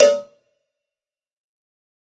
Dirty Tony Cowbell Mx 029
This is Tony's nasty cheap cowbell. The pack is conceived to be used with fruity's FPC, or any other drum machine or just in a electronic drumkit. ENJOY
pack, tonys, cowbell, drumkit, dirty, realistic, drum